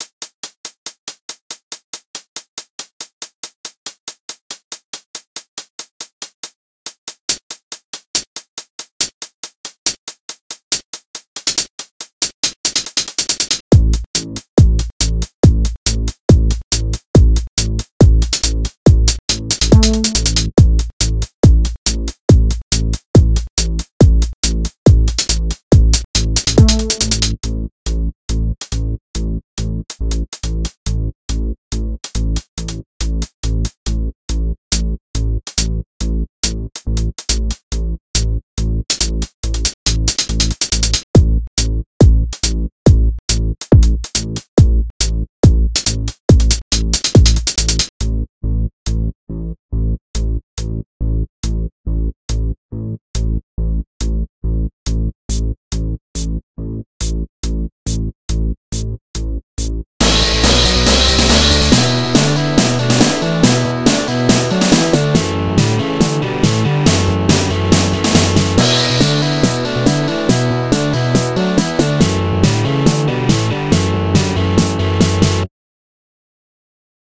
Tic Tac Drum Beat Loop
Drum loop with electric guitar at the end
beat, drum, Guitar, loop